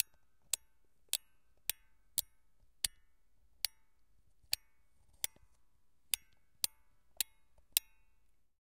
Winding up a music box.